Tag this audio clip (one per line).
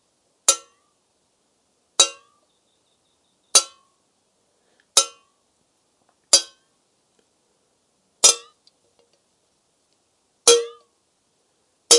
metalic
pica